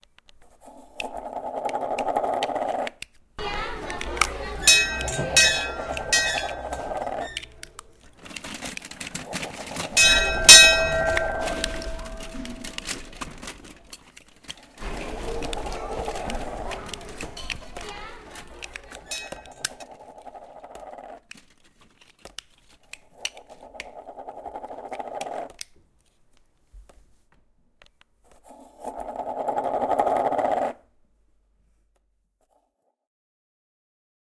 SonicPostcard GEMSEtoy Prokop1 - 16:05:14 09.22
Switzerland, Sonic, Postcard, TCR